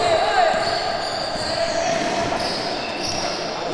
Gritos, tumulto tension